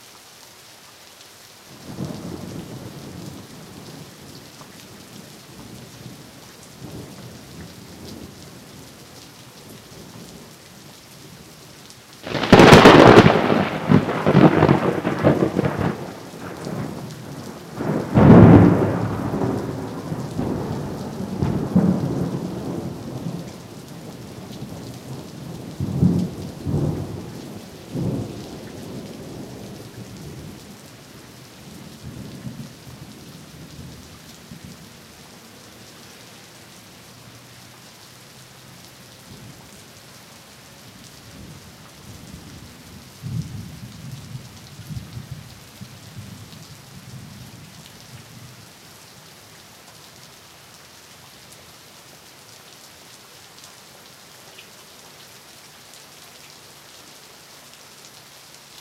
rain session thunder2 2006
Clip with sounds of rain and nice scary thunder from East Siberia. Recorded with Oktava 102 microphone and Behringer UB1202 mixer.
rain, storm, thunder